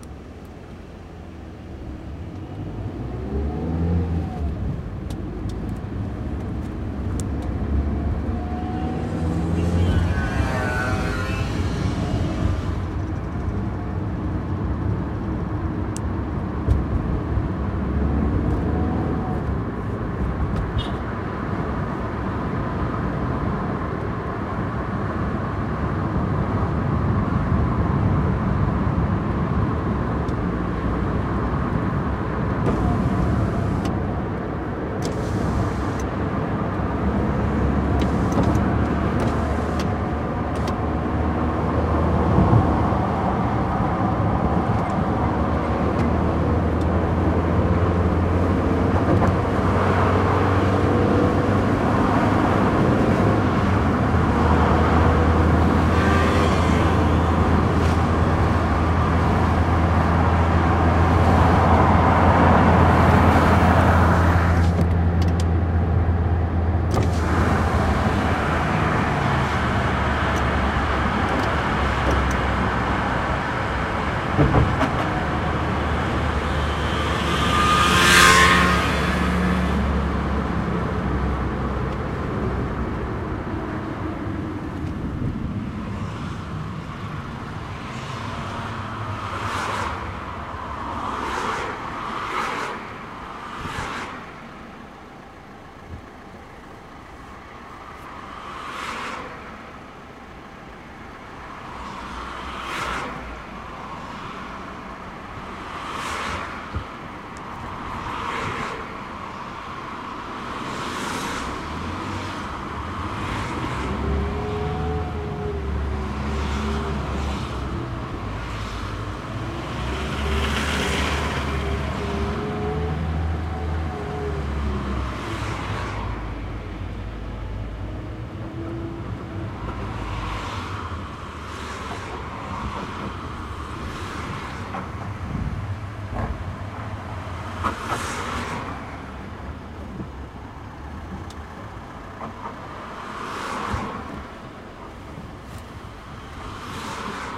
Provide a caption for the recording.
In Car Driving with Open Window
A drive in my car. Windows opened: traffic, city, road noises, etc.
Recorded with Edirol R-1 & Sennheiser ME66.